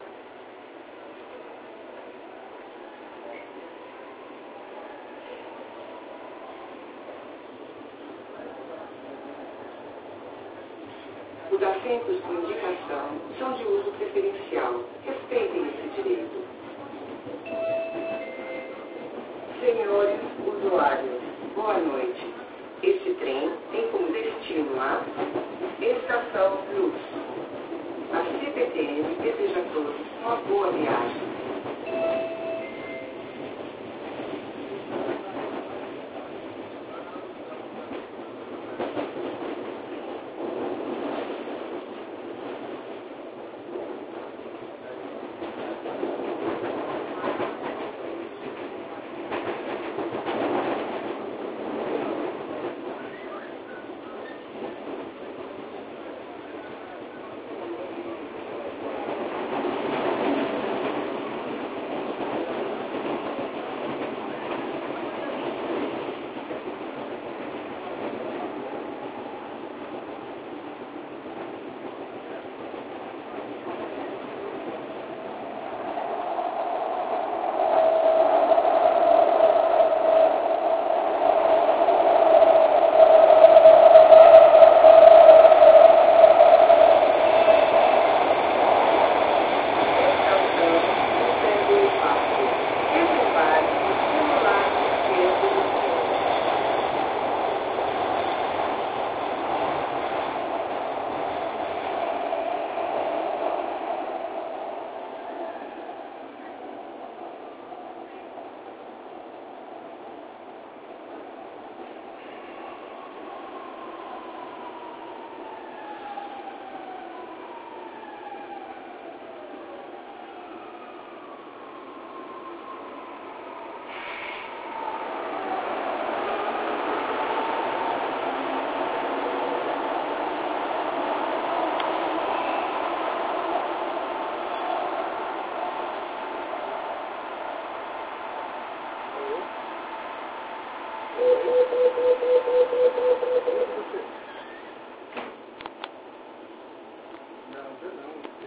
new train trem novo - Felipe RuizBRX11
Ambient sound from the new train of Sao Paulo.
Som ambiente do trem novo de Sao Paulo.
ambiance, ambience, ambient, ambiente, atmosphere, background, background-sound, barulho, field-recording, general-noise, new, noise, novo, people, som, sound, soundscape, train, trem, voice, voz